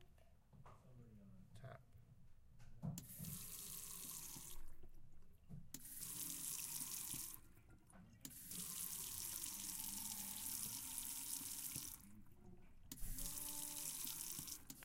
sonicsnaps GemsEtoy davidtap
Etoy, sonicsnaps, TCR